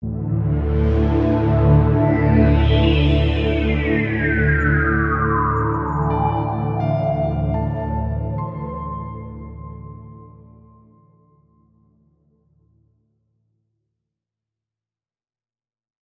welcome to a new world
A short introduction musical piece.
film, game, free, intro, movie, soundtrack, electronic, soundscape, slow, cinematic, ambient, piano